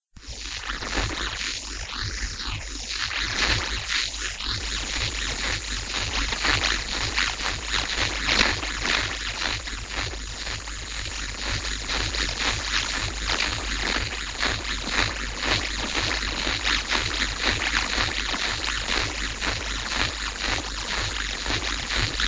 Space Static
By phasing a normal radio static noise, I made this strange sound.